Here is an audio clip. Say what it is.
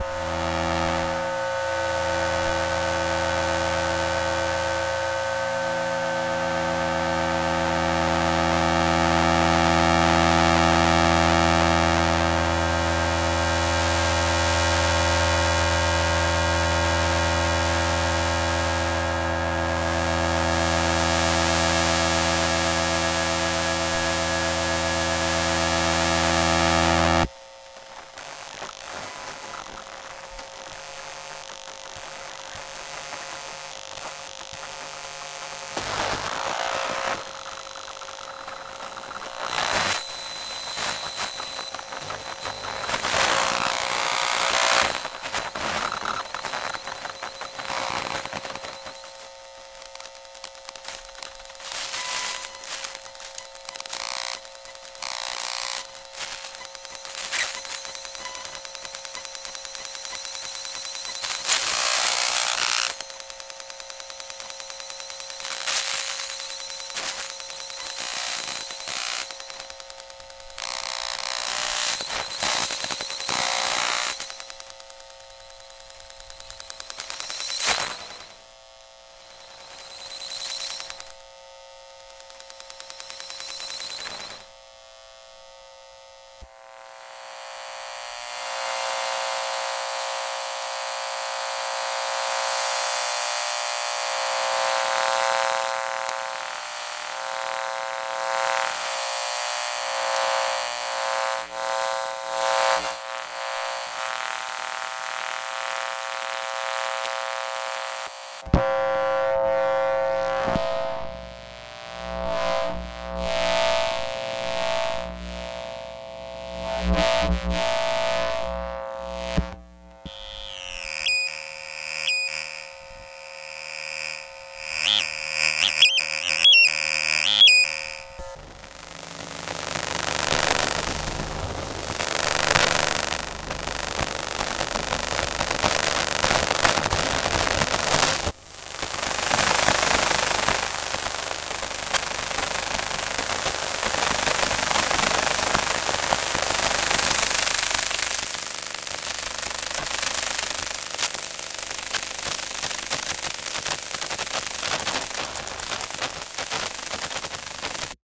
Make Noise EMF Reel
Electro-magnetic field readings from a number of devices around the Make Noise shop. Created and formatted for use in the Make Noise Morphagene.
fields, mgreel, electromagnetic, oscilloscope, emf, morphagene, microwave